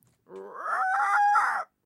I dont'n know what it can be, maybe monster of little dino?
croak, monster, cheep, female, dinosaur, screech, dino, squawk, basilisk